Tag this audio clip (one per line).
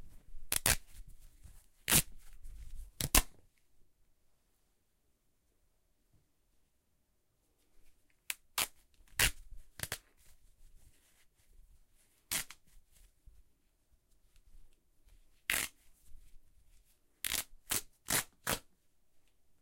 tearing
ripping
fabric